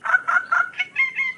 samples in this pack are fragments of real animals (mostly birds)sometimes with an effect added, sometimes as they were originally
funny, sonokids-omni